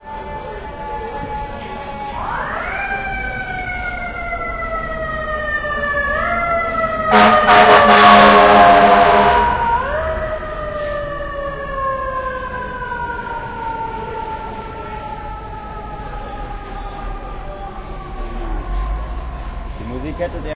2100 FX NewYork Sirenes on street3LoudHonking
OKM Binaural recording in New York
ambience, big, car, cars, city, field-recording, new-york, noise, nyc, people, police, sirene, street, traffic